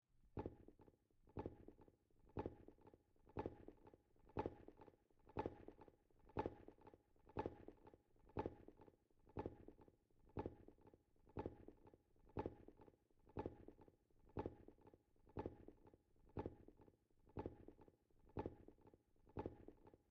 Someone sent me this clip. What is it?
For my own obscure reason I took file 389436, looped it so that it repeats at 60 bpm, added about 25% echo, then added bilateral panning at 15 cycles per minute (cpm).